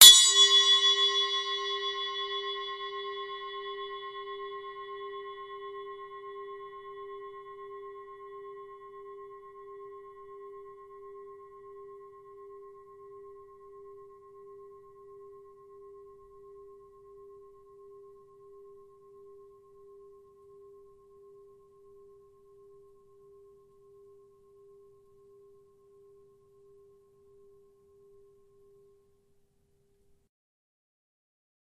zilbel 6in hrd2
After searching the vastness of the interweb for some 6 inch zilbel samples with no luck, I finally decided to record my own bell. Theres 3 versions of 4 single samples each, 4 chokes, 4 medium and 4 hard hits. These sound amazing in a mix and really add a lot of life to your drum tracks, they dont sound over compressed (theyre dry recordings) and they dont over power everything else, nice crisp and clear. Ding away my friends!
6, bell, zilbel, zil-bell, zildjian, zildjian-6-inch-bell, zildjian-bell, zildjian-zilbel, zildjian-zil-bell